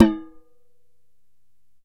hit - peanut can 15
Striking an empty can of peanuts.